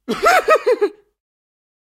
Awkward Laugh 03
03, 3, Animation, Awkward, Bizarre, Comic, Dry, File, Funny, Human, Humorous, Humour, Joy, Laughter, Wave